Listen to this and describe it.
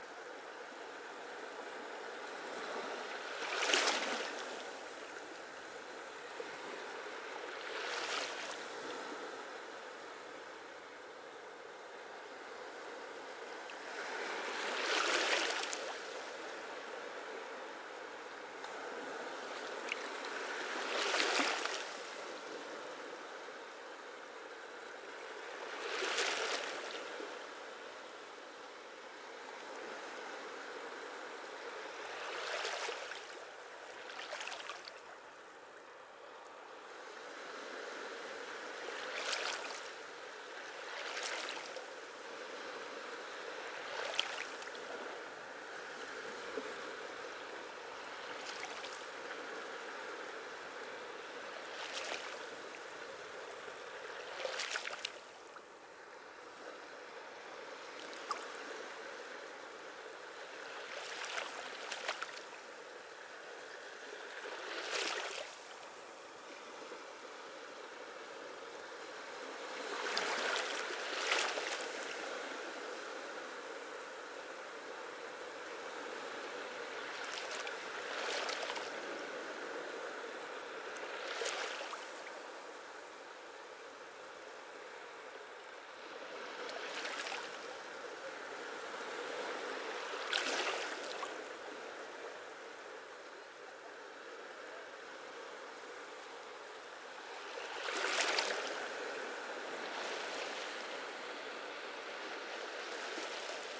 Slow rolling waves onto the evening beach. Microphone close to the water surface. No wind that day. Recorded with the Rode NTG-2 mic to Sound Devices 702. Applied some low end roll off EQ and normalized to -12dBFS.